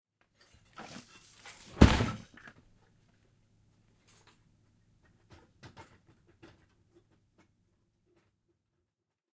More like a thump than a crash. This is a box of plastic junk dropped on floor. This is before I turn it over. Recorded with ECM-99 to Extigy sound card. Needed some elements for a guy crashing into some junk.
Accidentally had phonograph potted up on mixer - 60 cycle hum and hiss may be present. Used noise reduction to reduce some of this.
crash, soundeffect, thump